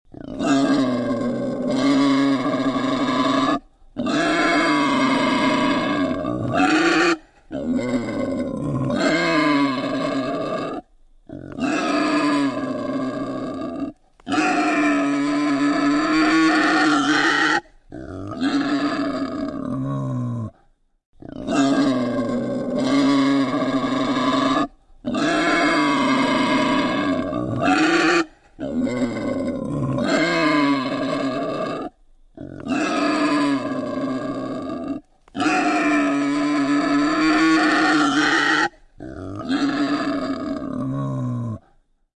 Wild Boar / Grunting Growling
Wild Boar / Grunting Growling
Boar, Wild, Grunting, Growling